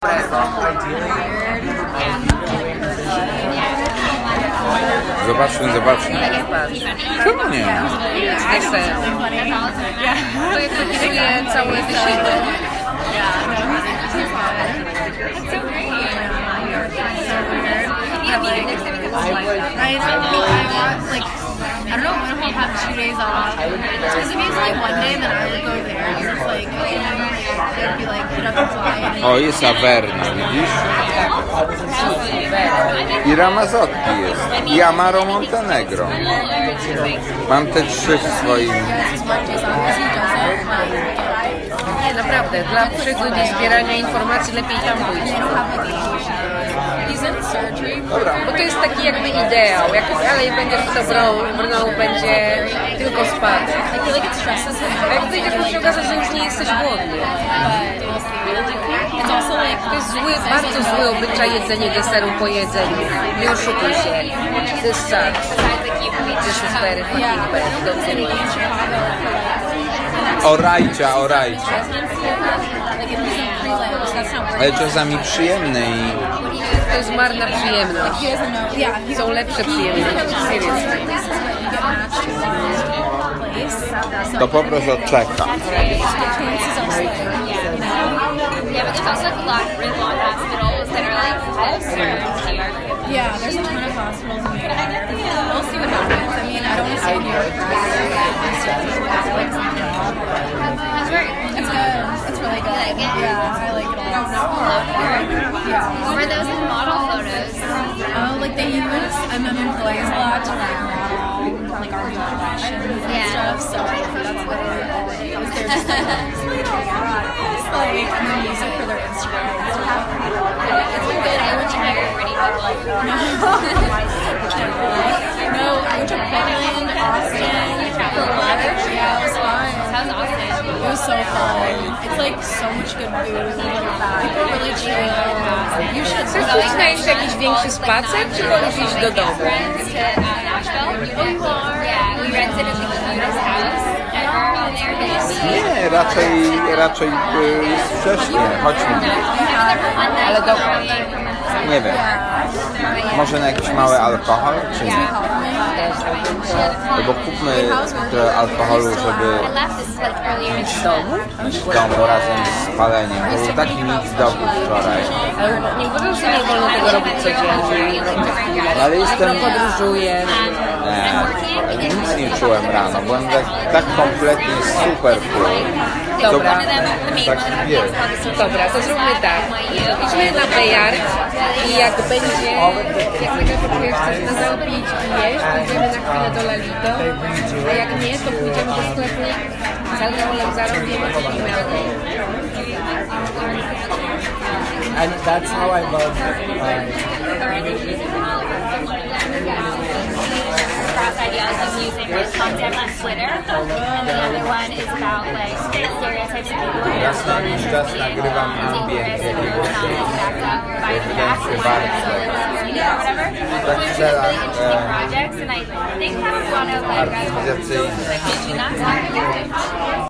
Atmosphere Dimes Restaurant New York
chatter, crowd, field-recording, loud, noise, people, voices